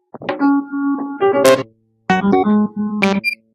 CASIO SK BRAINGLITCH
Loop created by a casio SK series with a clock bending to it's sound computer. it was not edited by any means, exept for being cut to a single repetition of what this keyboard was repeating.